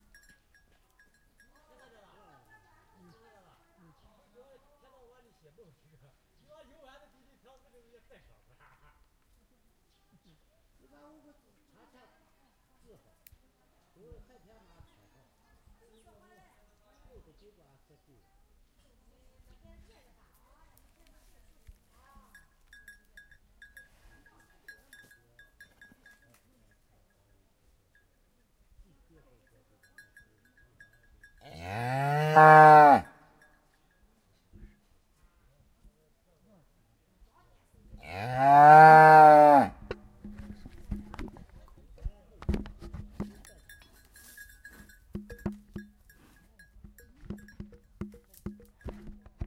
in a mountainous area there is only the old cow